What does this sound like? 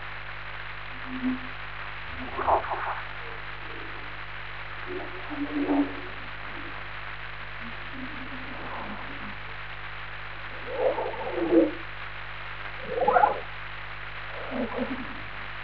Strange, but cool sound..
This sound was recorded in the winter of 2004, through a voice recorder connected to a conventional long wire as an antenna and an electromagnetic seeker.
abstract, electric, electronic, freaky, future, glitch, interference, noise, radio, static, strange, weird